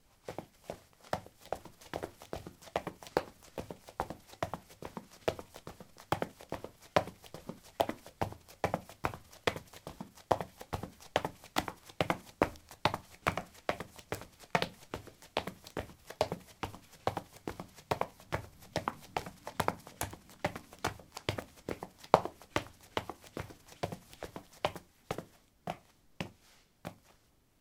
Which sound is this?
concrete 05c summershoes run
Running on concrete: summer shoes. Recorded with a ZOOM H2 in a basement of a house, normalized with Audacity.
step, footstep